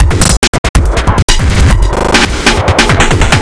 "glitch loop processed with plugins"